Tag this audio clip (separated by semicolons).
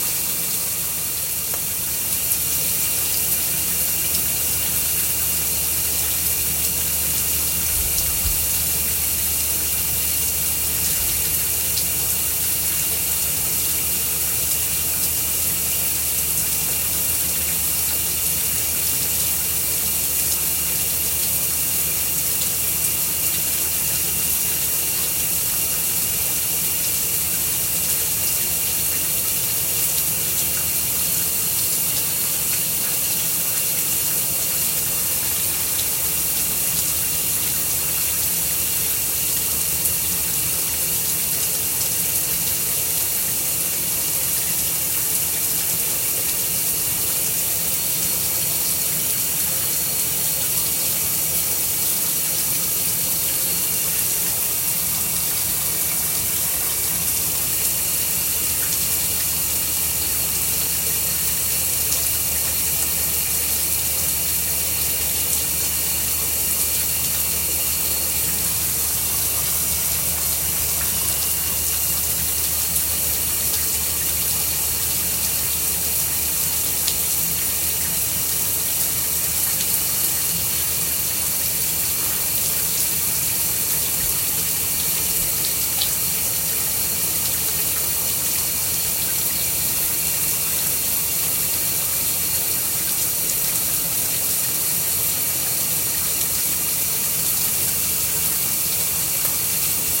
water; shower; 16bit; field-recording